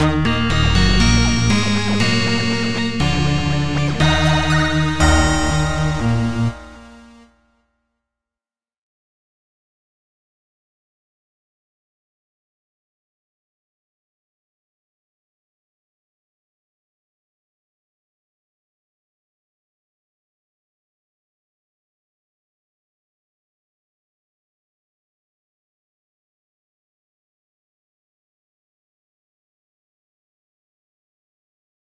short loops 31 01 2015 c 2
game, gameloop, music, loop, 8bit, retro, short, tune, melody